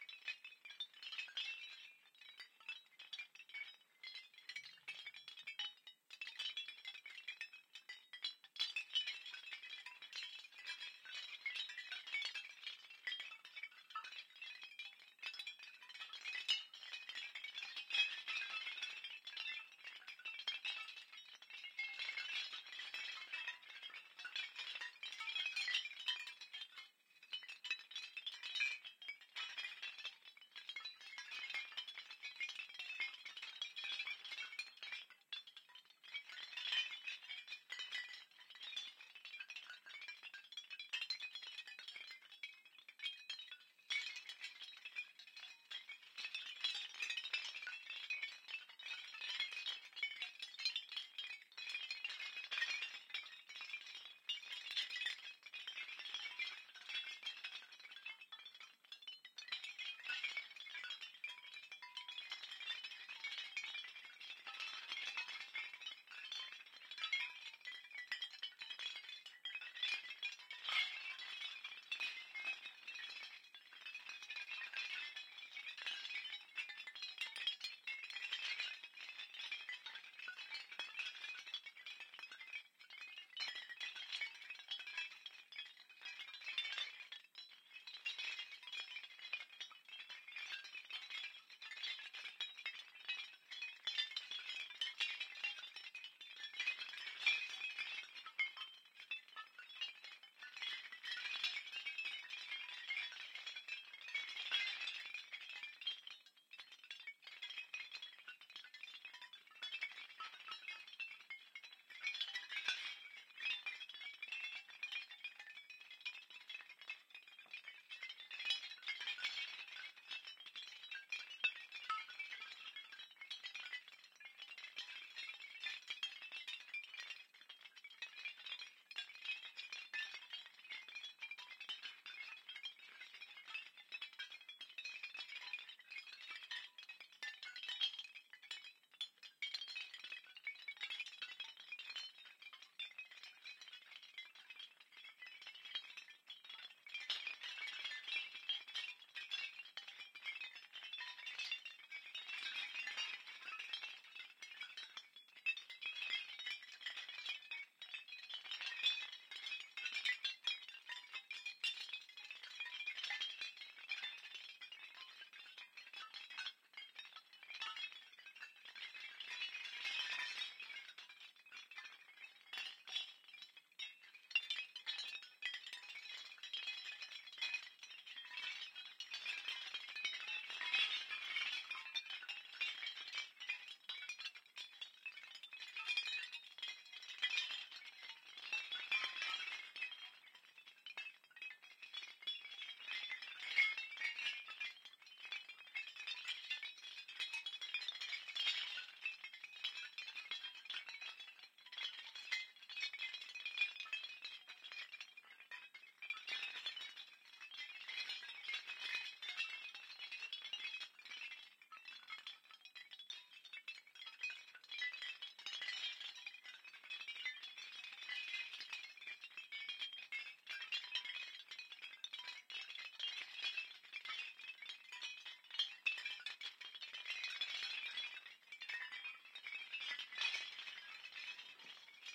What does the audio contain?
pitched small bells
a recording of many small bells, pitched down 2 octaves.
MJ MK-319-> TC SK48.